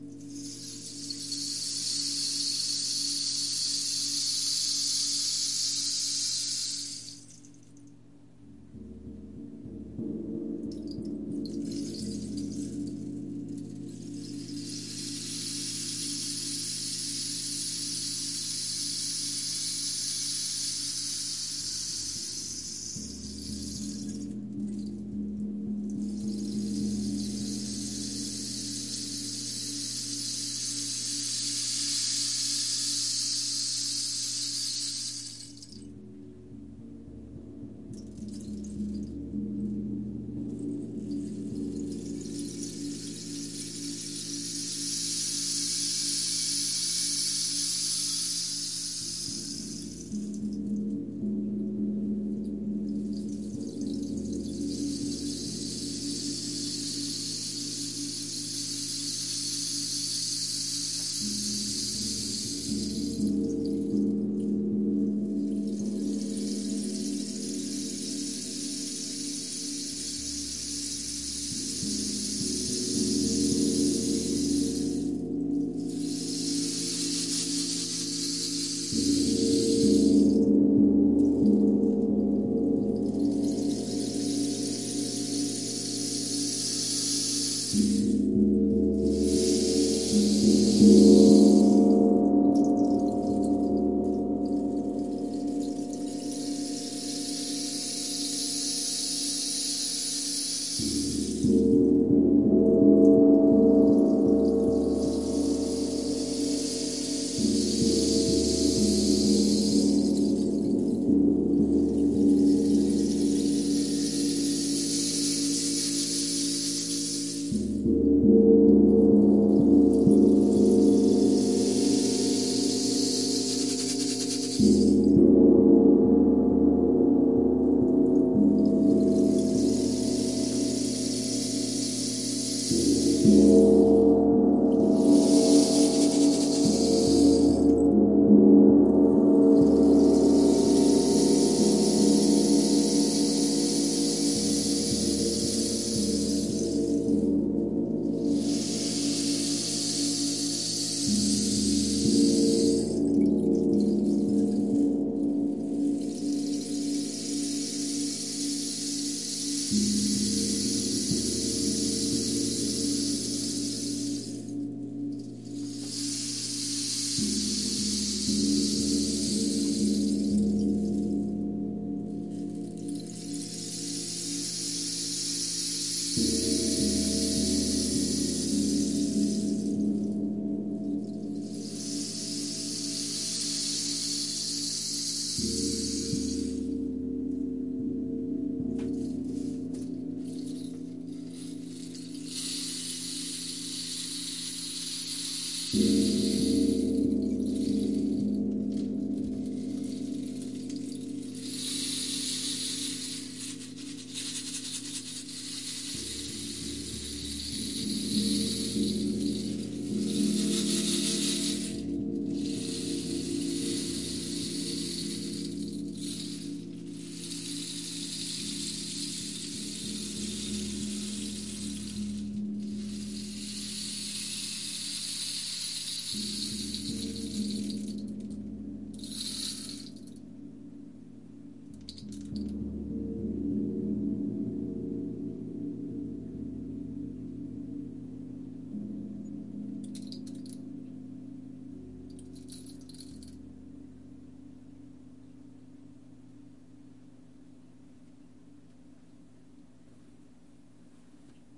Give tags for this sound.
ambient
gong
metal
percussion
rainstick
storm

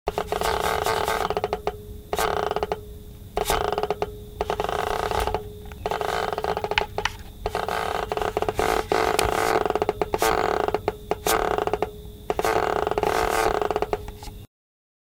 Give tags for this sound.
controller
creaks
creak
Steam

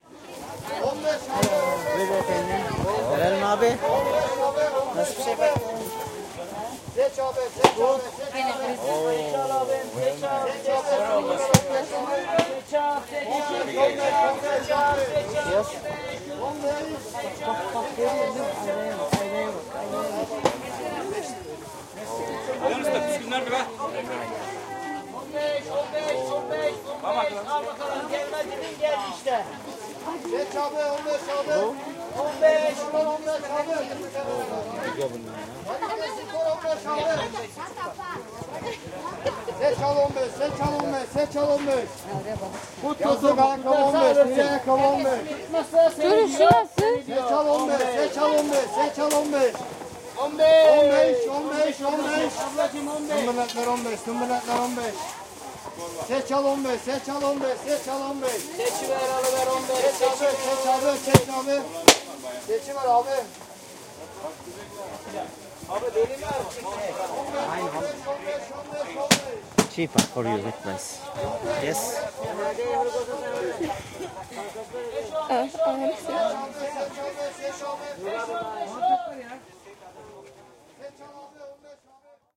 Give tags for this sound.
market
turkish

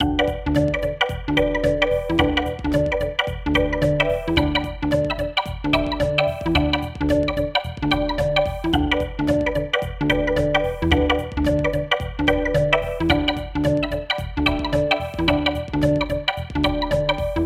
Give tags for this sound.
beat
loop
rhythm
rhythmic

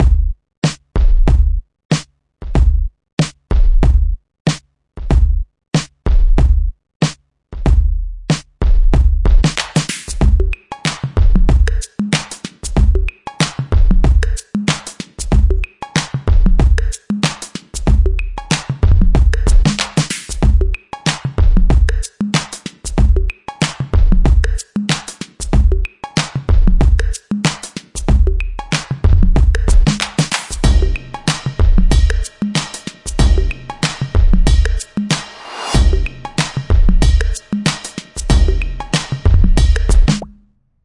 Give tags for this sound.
808
beat
drum
drum-loop
drums
groove
loop
machine
percs
percussion
percussion-loop
quantized
rhythm
ride
swing

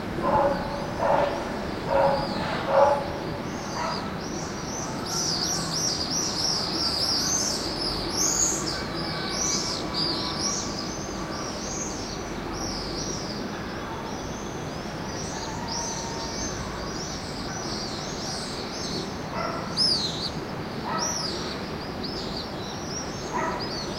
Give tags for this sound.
ambiance
streetnoise
swift
birds
nature
field-recording
city

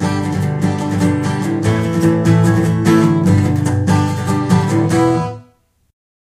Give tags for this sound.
acoustic
flamenco
guitar

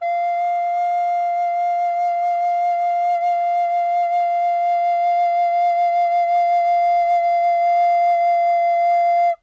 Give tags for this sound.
flauto-dolce
flute
plastic-recorder
recorder
woodwind

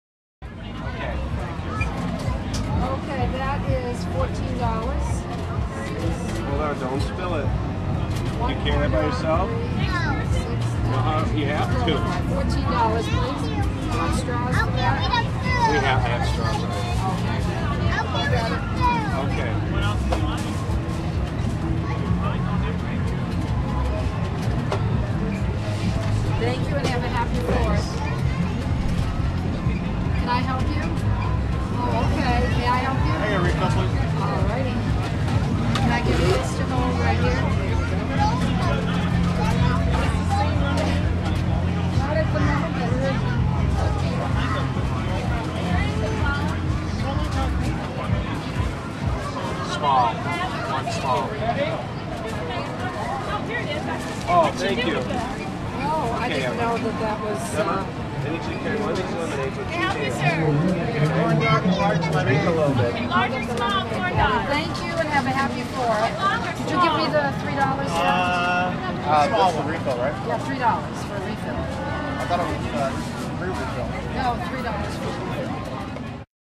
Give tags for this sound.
4th
carnival
field-recording
food-ordering
july
men
talking
women

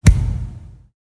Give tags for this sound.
concrete
concrete-wall
concretewall
crack
fist
hand
hit
hits
human
kick
knuckle
pop
slam
slap
smack
thump

